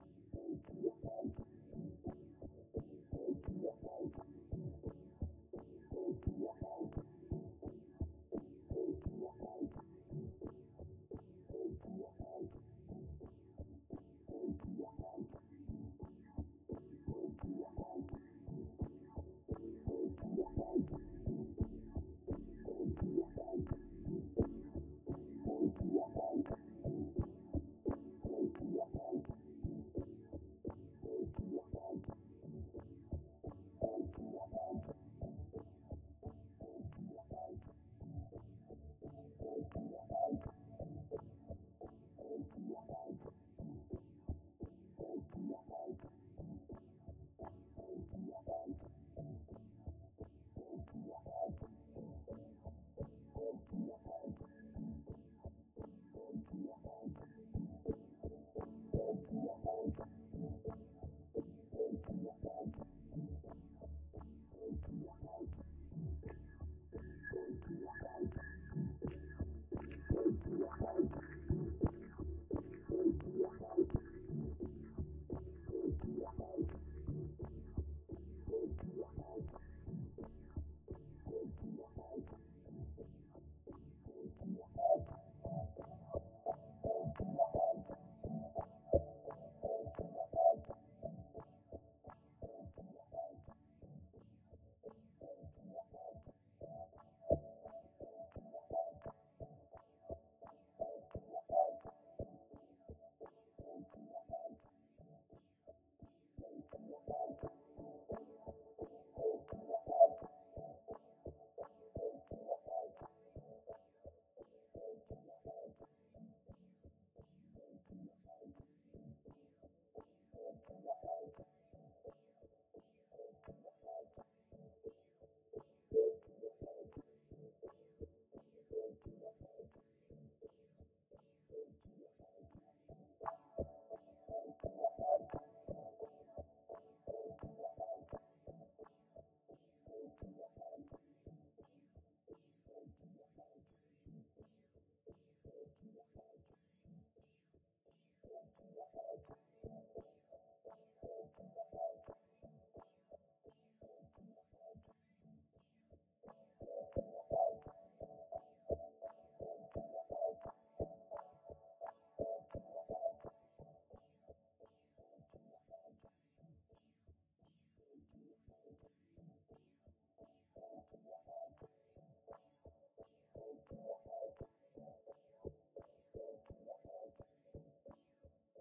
Dmaj-water gate2
A gated pad I created for my music. Used in "Elements" LP, track 2 "Water basin". In Dmaj.